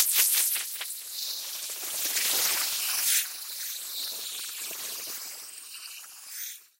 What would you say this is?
Alien's tail
An alien with a long, dangerous tail is running from an enemy. Sample generated via computer synthesis.